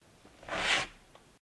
Swipe/Scrape
Metallic, Scrape, Swipe